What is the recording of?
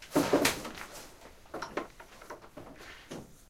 wood hit poc
wood hits hit poc pock bang bois platform